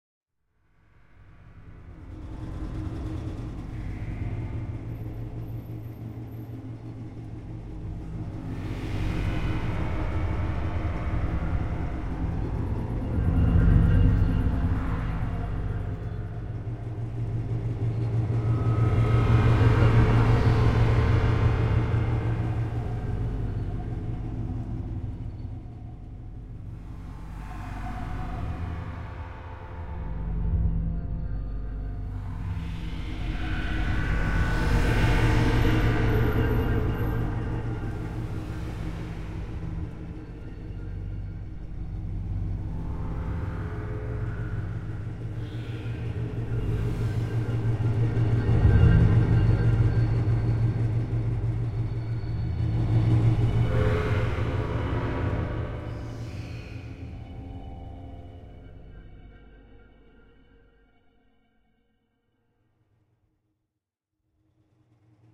Wind space nebula
Harsh Atonal harmonic wind texture, created by banks of bandpass and peak/notch resonant noise filters randomly flowing up and down. Created in Max/Msp and mastered in Logic 7 Pro.
wind, competition, nebula, space